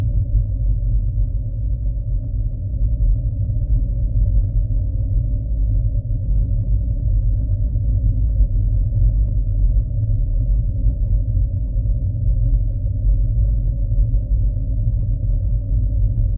You can use this to mimic the ambient noise of some large vessel traveling under large engine power, but keep it in the background where it won't interfere with dialog or whatever. This could be a yacht, a airliner, a starship, or whatever. Created in Cool Edit Pro.